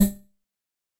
STAB 023 mastered 16 bit
A short percussive sound. Created with Metaphysical Function from Native
Instruments. Further edited using Cubase SX and mastered using Wavelab.
electronic, percussion, short